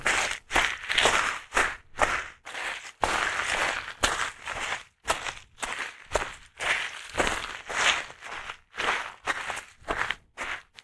Walk WetGravel NormalSpeed
Me walking on soggy wet gravel near my stream. Recorded with my Walkman Mp3 Player/Recorder. Simulated stereo, digitally enhanced.
gravel, soggy, walk, wet